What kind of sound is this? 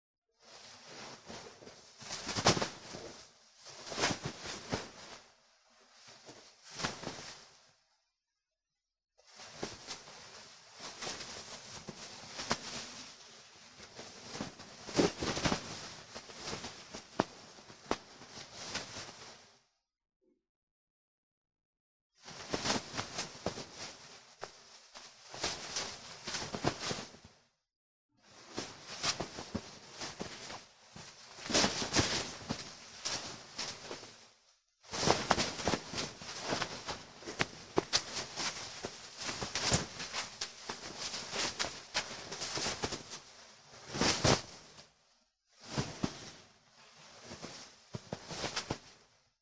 Shirt Clothing Movement - Mono audio.
blankit, cloth, clothes, clothing, cotton, dressing, foley, movement, pants, rubbing, rustle, rustling, sheet, shirt, swish, textile, wear